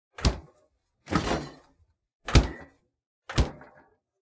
Fridge Door Open and Close
Refrigerator door open and close. Recorded with Sennheiser 416 onto Tascam DR-680.
close, kitchen, open